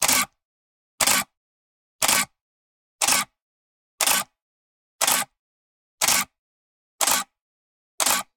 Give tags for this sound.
5d; camera; camera-click; canon; dslr; effect; gear; high; lever; mark; mechanic; mechanical; mono; nikon; pentax; photo; photography; picture; quality; shutter; slr; sound; sp1000

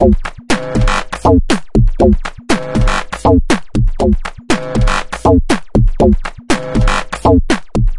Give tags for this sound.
120bpm; drumloop; electronic; loop; rhythmic

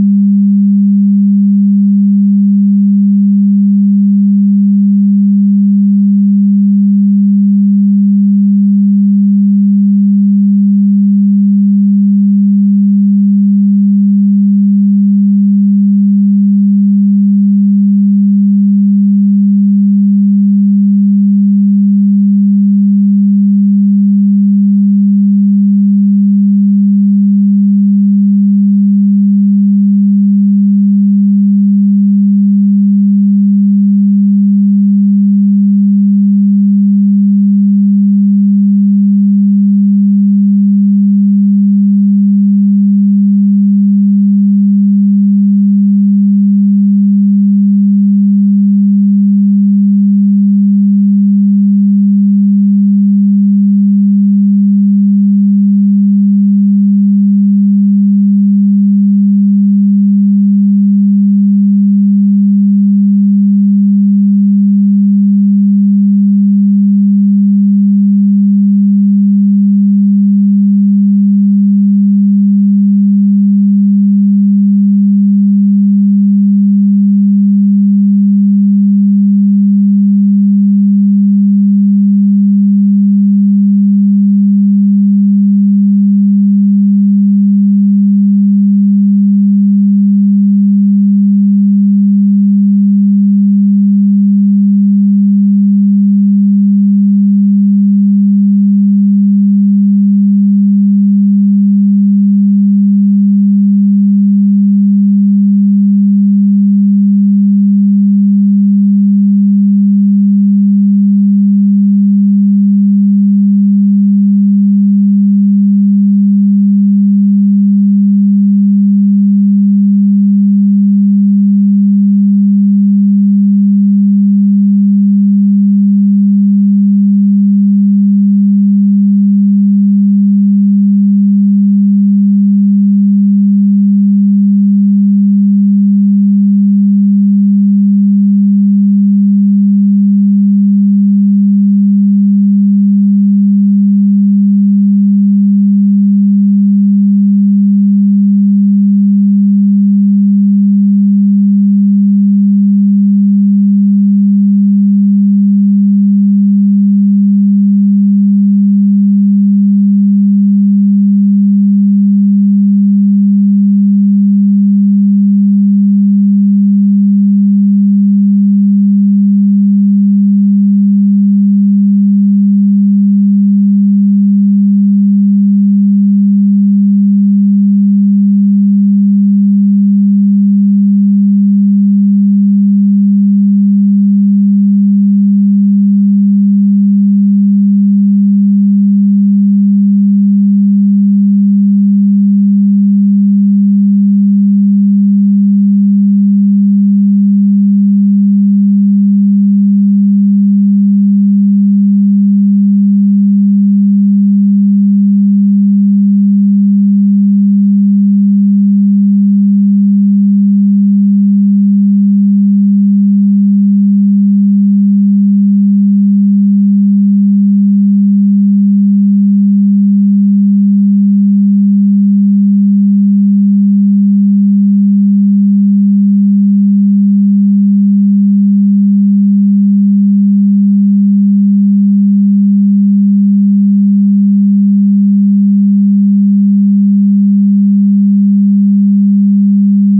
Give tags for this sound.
synthetic; electric; sound

